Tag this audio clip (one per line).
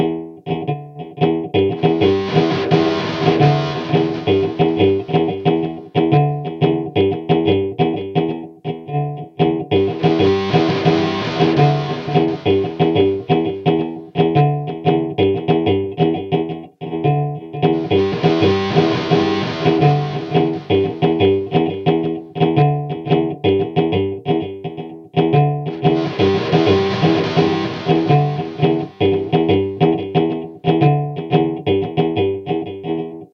amp
amplifier
effect
electric-guitar
gate
gated
guitar